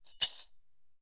glass - baby food jar - shards shaken in ceramic bowl 09
Shaking a bowl filled with shards of a broken glass baby food jar.